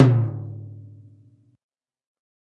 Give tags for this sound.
A-Custom bronze bubinga click crash custom cymbal cymbals drum drumset hi-hat K-Custom metronome one one-shot ride shot snare turkish wenge

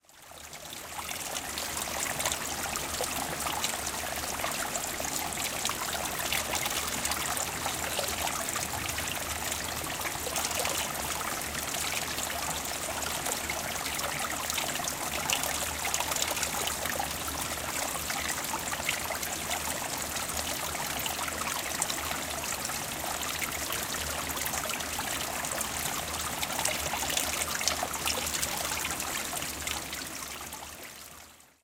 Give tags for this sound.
water trickling flow flowing river dripping trickle liquid field-recording brook stream creek gurgle

